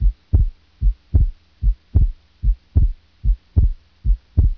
Cardiac and Pulmonary Sounds
sounds for medical studies
anatomy, cardiac